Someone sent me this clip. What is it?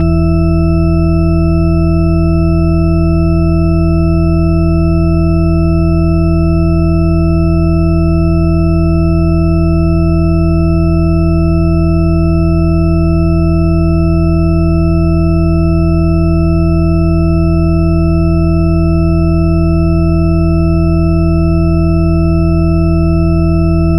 From Wikipedia:
"A Shepard tone, named after Roger Shepard (born 1929), is a sound consisting of a superposition of sine waves separated by octaves. When played with the base pitch of the tone moving upward or downward, it is referred to as the Shepard scale. This creates the auditory illusion of a tone that continually ascends or descends in pitch, yet which ultimately seems to get no higher or lower."
These samples use individual "Shepard notes", allowing you to play scales and melodies that sound like they're always increasing or decreasing in pitch as long as you want. But the effect will only work if used with all the samples in the "Shepard Note Samples" pack.